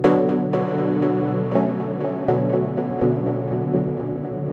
Synth Loop 6
Synth stabs from a sound design session intended for a techno release.
loop, stab, pack, techno, electronic, oneshot, experimental, sample, design, sound, synth, music, line